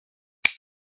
Clicksound
Recorded with a Sony MZ-R35
click, lego